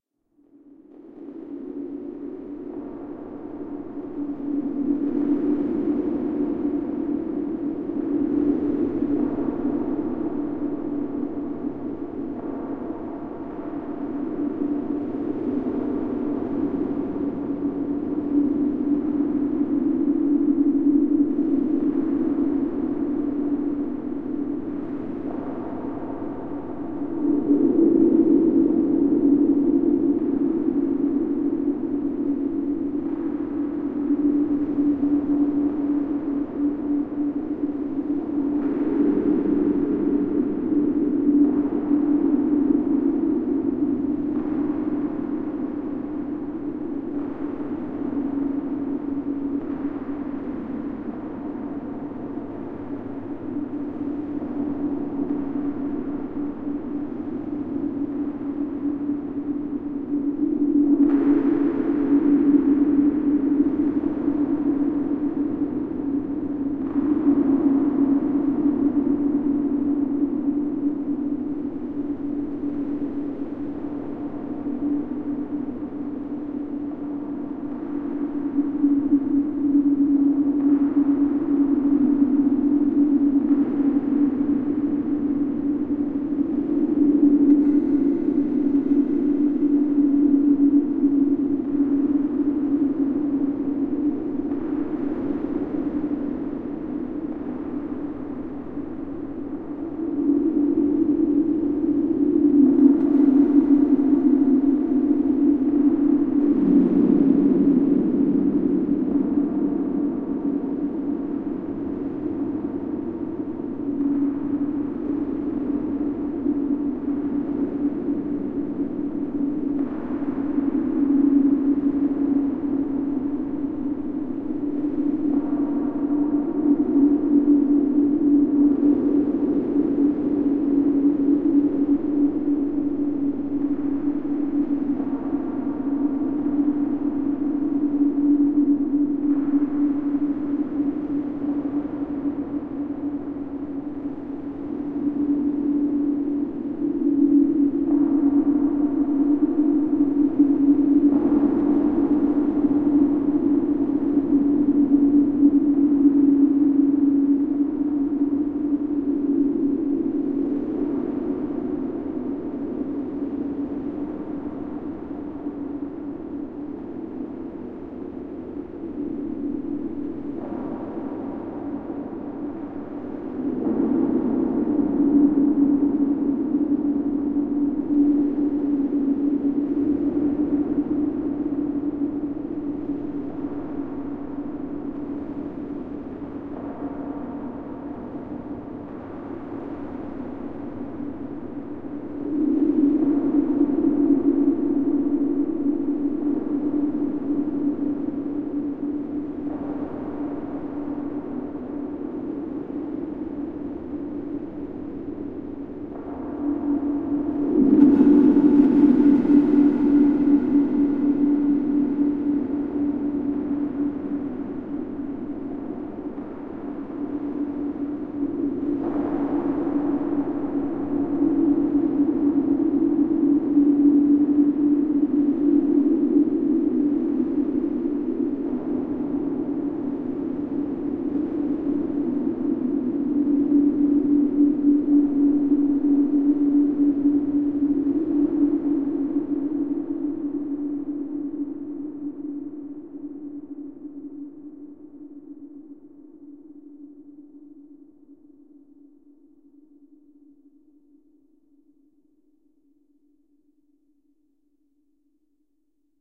EZERBEE DEEP SPACE DRONE AA
This sample is part of the "EZERBEE DEEP SPACE DRONE A" sample pack. 4 minutes of deep space ambiance. The sound was send through the Classic Verb from my TC Powercore Firewire.
drone, effect, electronic, reaktor, soundscape, space